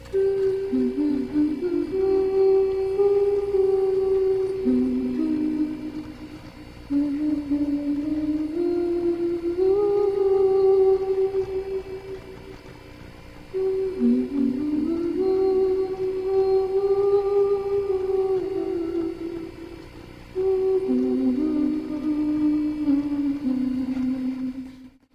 My girlfriend hummed a tune for me. Very hauntingly beautiful.
girl, hum, humming, melody, tune, vocal, voice